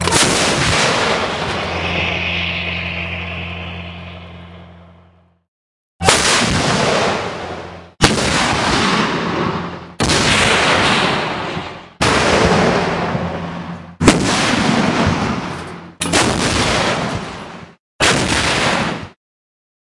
South Korean artillery firing projectiles.
explosion, definition, bang, Regiment, Force, III, high, Battalion, 8th, training, Division, boom, Artillery, bouf, ROK, Expeditionary, Marines, KMEP, explosions, Marine, 3rd, 12th, 2nd, 12-7, tank